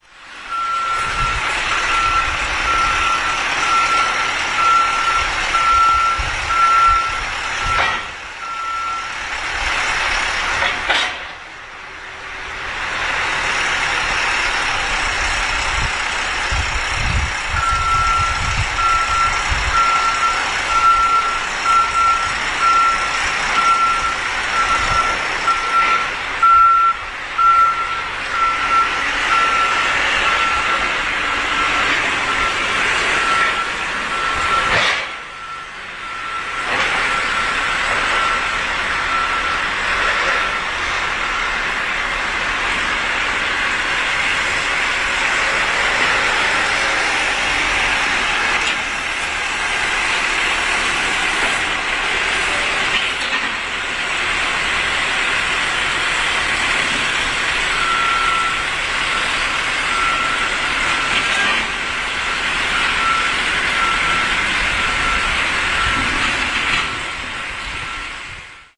clearing of snow 221210
22.12.2010: about 00.20.Gorna Wilda street. two bulldozers are cleaning of snow from pavements.
bulldozer, clearing-of-snow, machine, night, noise, street, truck